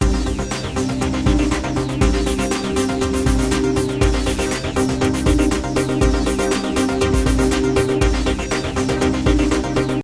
Used on one of my videogames